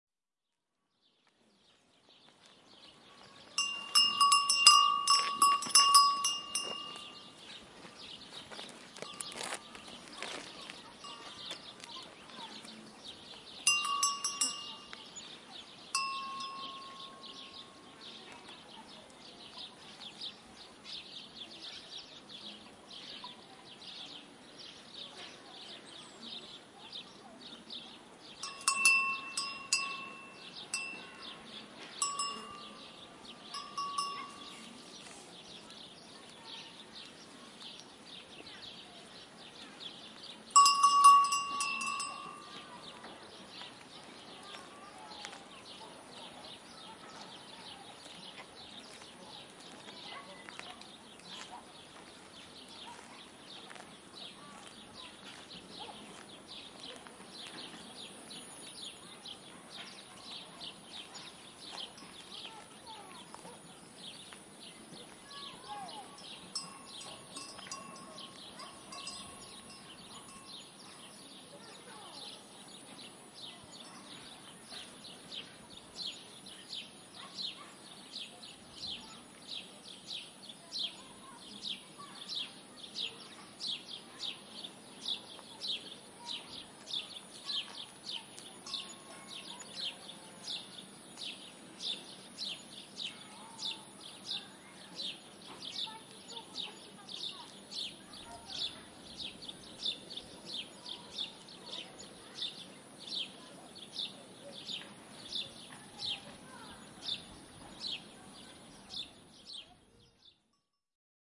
amb-birds-cowbells saranda
Field recording made in Saranda 2010. Birds singing, cowbells, movement, ambiance. Recorded with Zoom H4n.